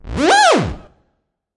Theremin Horse
A neighing theremin pulse